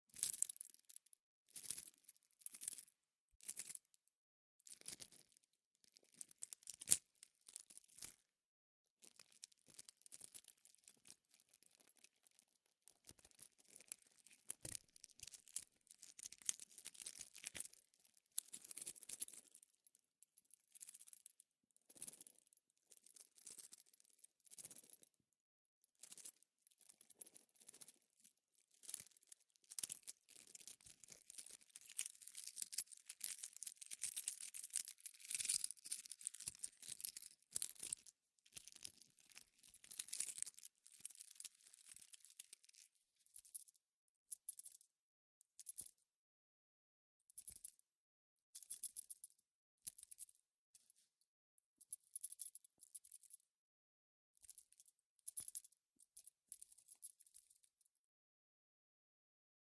steel wrist watch bracelet
Sounds of a steel watch bracelet moved, shaken and squeezed in a hand. May be used for sound design, foley, etc.
I recorded this for my own sound design purposes (game SFX) and thought I would share it with anyone who may find it useful - if you do, please help yourself and enjoy!
bracelet, metal-sounds, steel-bracelet, watch, wrist-bracelet